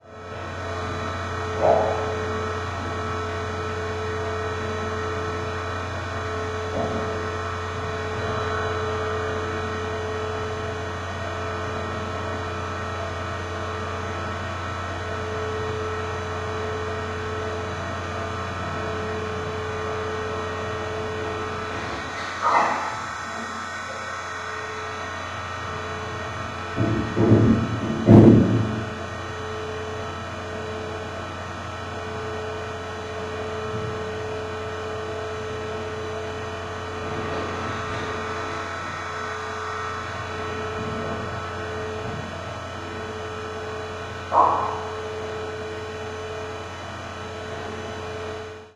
Machine Hum Dirty
The sound of a machine humming as a background sound. This version is not processed with EQ resulting in a more 'gritty' and 'dirty' sound.
dirty, fridge, hum, machine, refrigerator